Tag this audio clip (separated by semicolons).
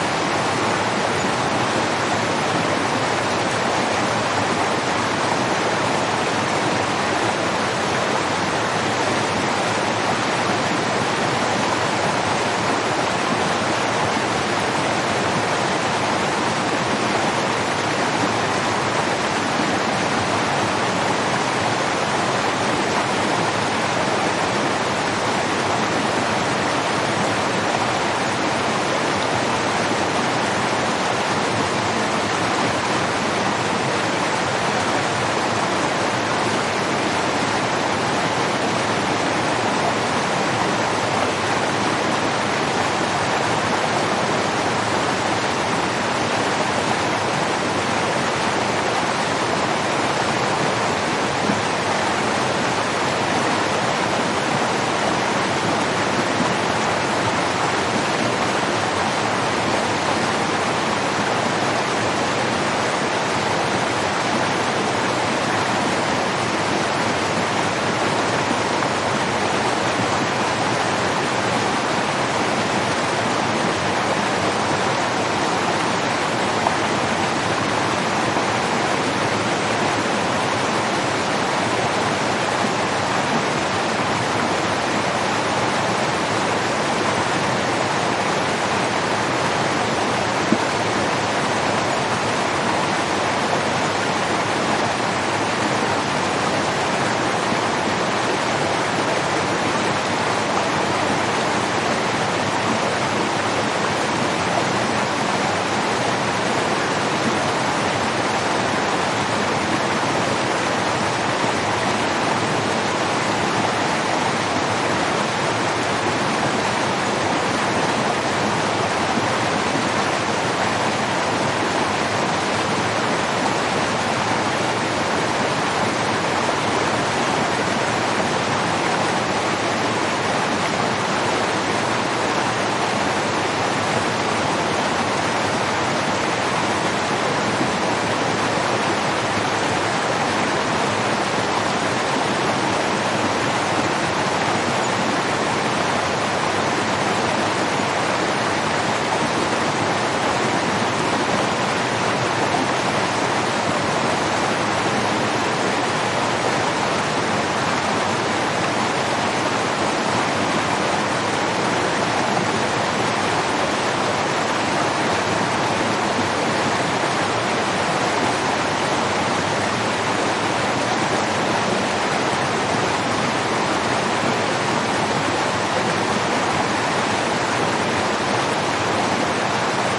field-recording; river; Spain; stream; thaw; torrent; water; waterfall